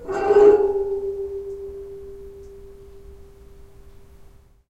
Metal Rub 2
Rubbing a wet nickel grate in my shower, recorded with a Zoom H2 using the internal mics.
metal, nickel, resonant, rub